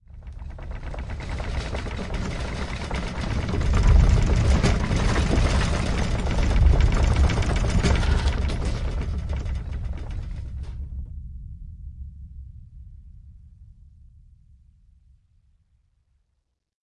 A mixdown of a recording of a tray of crockery being shaken and an earth tremor rumble.